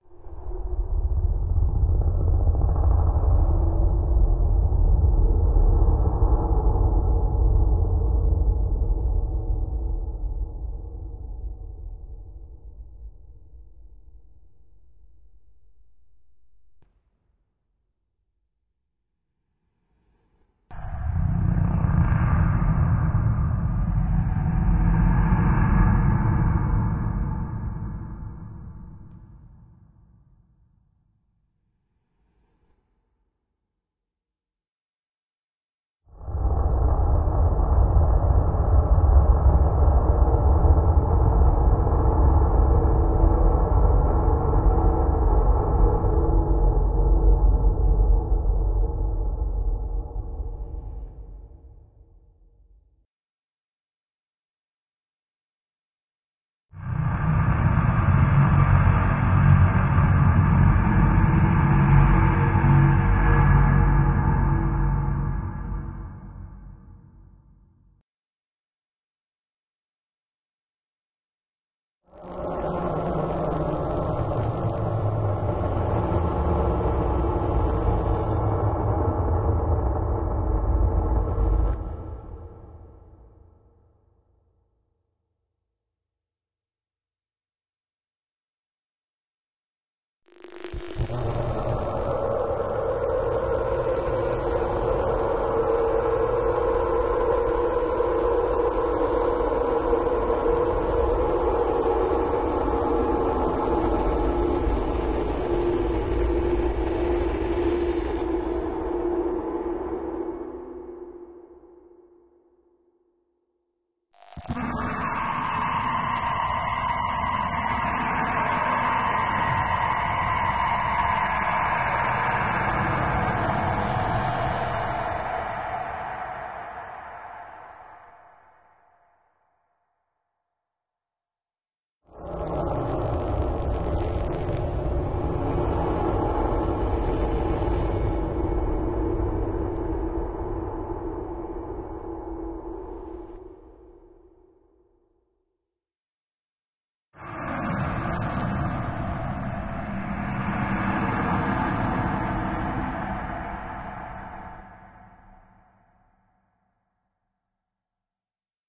Tesla Monster - Low Growl
A bunch of sounds I came up while fiddling around on my synths..
Sounds almost like some electronic monster, creature or something like that to me.
These sounds are made by processing the "Tesla Monster - Growl" sound from my "Tesla Monster" set
aggressive, amp, amplified, bass, creature, dissonance, distortion, dramatic, electric, electronic, growl, guitar, high, horror, low, massive, monster, screechy, stinger, synthetic